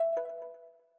vOpt Box
Short noise/ sound for notifications in App Development.
The sound has been designed in Propellerhead's Reason 10.
app, chime, click, development, notification, Ring, sounds